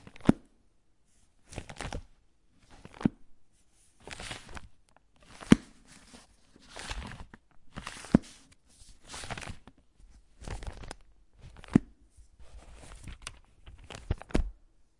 Opening and closing a large bible a bunch of times